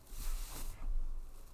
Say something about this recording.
A recording of a broom being brushed with a hand. Recorded in Audacity with the Blue Snowball iCE.